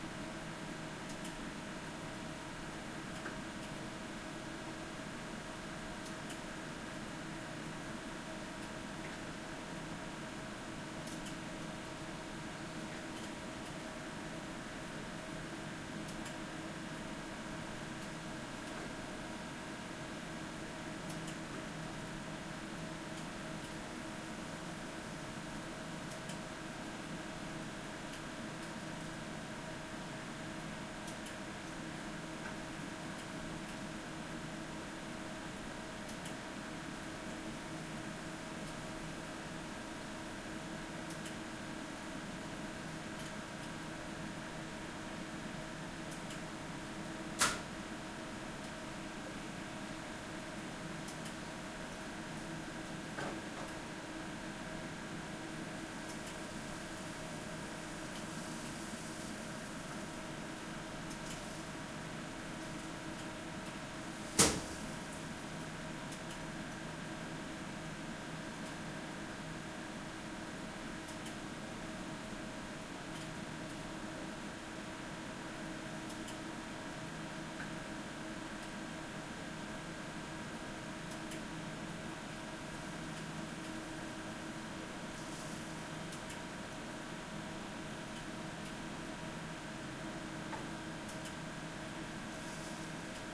Furnace Room 2
A recording of the atmosphere in the furnace room in the basement of a school building.
This recording was done using a Zoom H6 with the MS (Mid-Side) capsule.
Here are some pictures of the room that this sound was recorded inside of. This recording was done close to the big yellow machine with tubes coming out of it, showing on picture 1, 2 and 3. The microphone was facing the tubes in the corner of the room showing in picture 4 with the big yellow machine on the left hand.
Lofoten, ambience, indoors, machines, mechanical, machinery, boiling, amb, ventilation, g, room, Norge, system, field-recording, Norway, noise, machine, basement, furnace, furnace-room, tubes, industrial